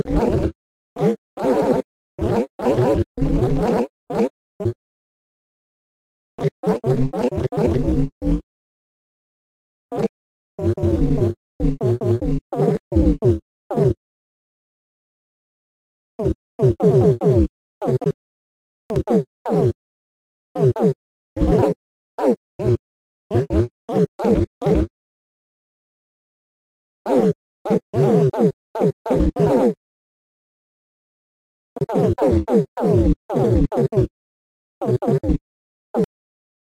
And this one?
Experimentation with programs that i "Rediscovered". I didn't think these "New" programs were worthy of using, but to my surprise, they are actually extremely interesting to work with!
These are really some bizarre effects that were produced with the new programs.
Alien, Alien-Species, Crazy, Nonsense, Outer-Space, Paranormal, Sci-fi, Strange, Unusual, Vocal, Weird